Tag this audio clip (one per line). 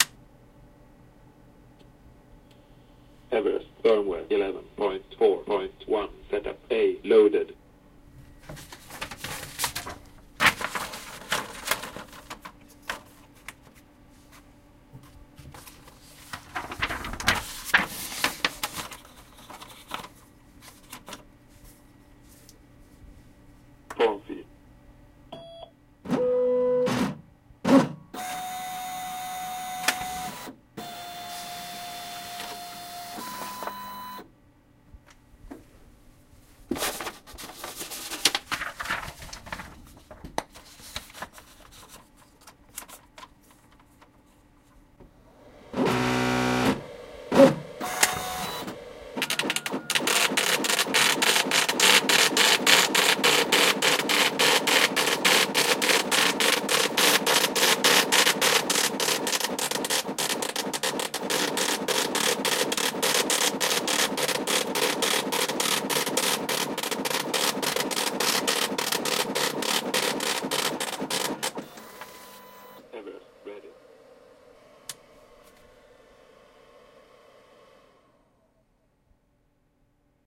print
braille
embosser
paper